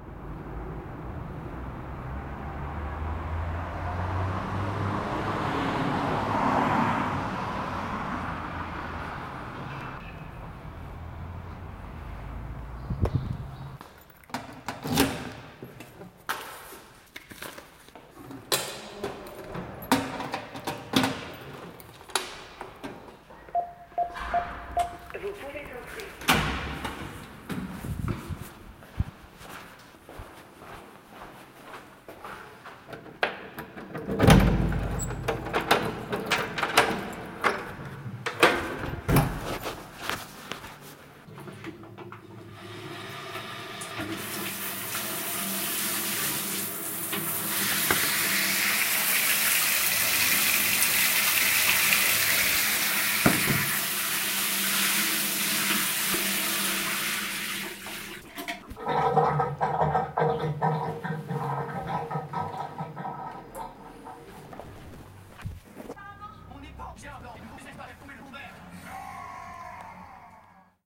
A sonic illustration of after long workday coming home people recorded with a Samsung S6 Edge+ and edited with Audacity
street, shower, door, letterbox